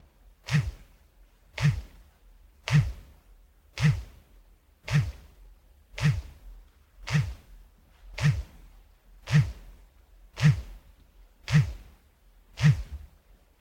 FX LuMu cellphone vibrating buzzing Huawei Y6 pants 100ms
Cellphone / mobile phone vibrating in pants
Model: Huawei Y6
Recorded in studio with Sennheiser MKH416 through Sound Devices 722
Check out the whole pack for different vibration lengths!